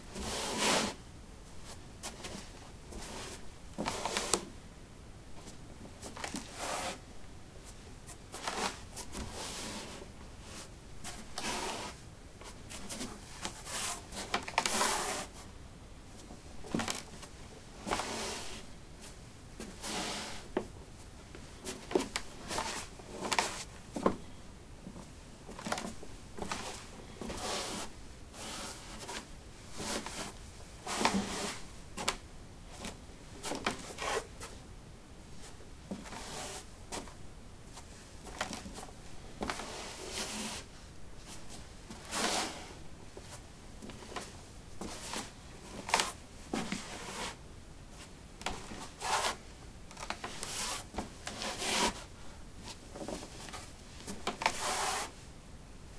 wood 02b socks shuffle
Shuffling on a wooden floor: socks. Recorded with a ZOOM H2 in a basement of a house: a large wooden table placed on a carpet over concrete. Normalized with Audacity.